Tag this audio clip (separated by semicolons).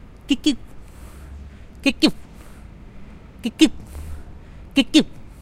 City; Leeuwarden; Time